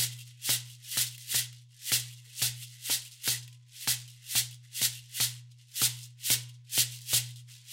Jerusalema 124 bpm - Shekere - clap rhythm 4 bars
This is part of a set of drums and percussion recordings and loops.
Shekere playing the rhythm of the hand claps.
I felt like making my own recording of the drums on the song Jerusalema by Master KG.
loop, percussion, rhythm, shekere